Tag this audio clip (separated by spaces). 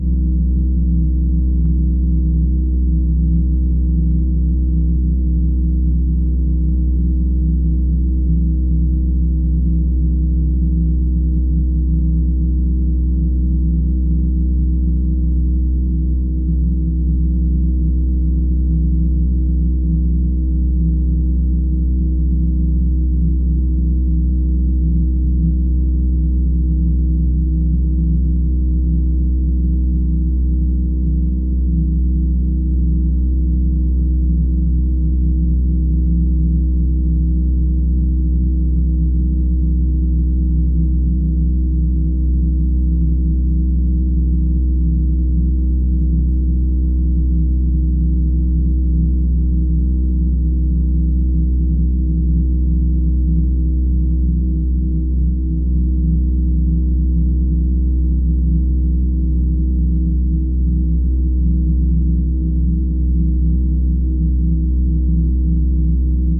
singing-bowl vibration water